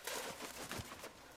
short pigeon wing flap
boost the lows, layer and add some delay and it's a good wing flap of a flock of birds
bird, flap, wing, wings